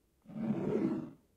Glass Slide 9
Me sliding a glass cup around on a wooden surface. Check out my pack if this particular slide doesn't suit you!
Recorded on Zoom Q4 Mic
wood, drawer, open, scrape, slide, close, glass, wood-scrape, glass-scrape